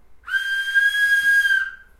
Blowing a whistle